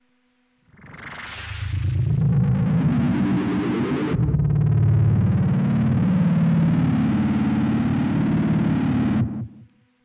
Dark robotic sample 014
Sample taken from Volca FM->Guitar Amp.
fm, artificial, robotic, sample, dark, volca